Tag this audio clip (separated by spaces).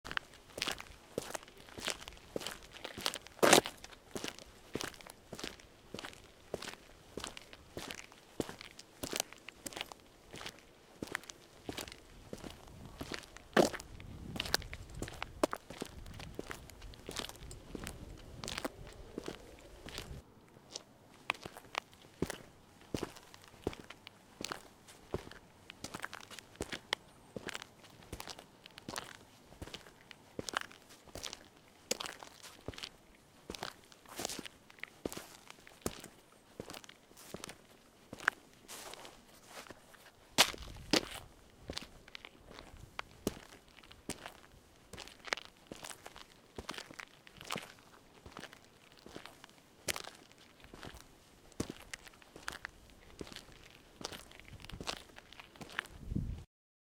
foot steps walk walking